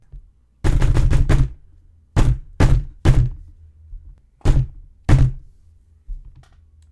This is a door being violently pounded on. I did a few different types. A rapid succession of pounding and a few slower bangs.